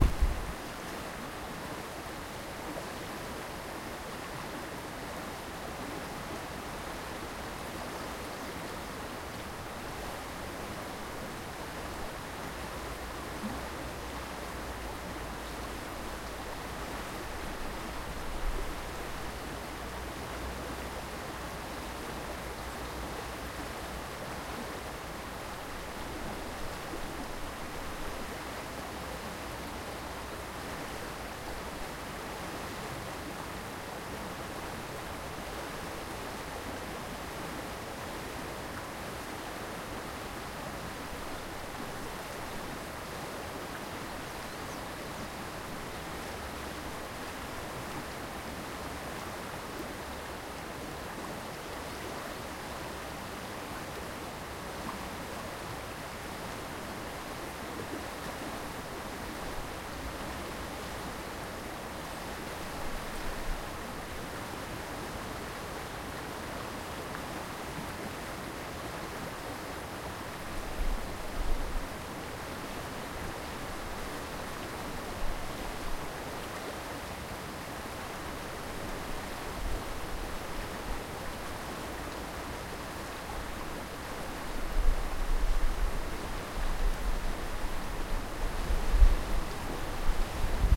Wind in trees beside river
field-recording, river, water